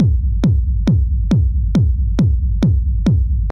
4 maal vier plus sup loop
hard, kickdrum, loop, techno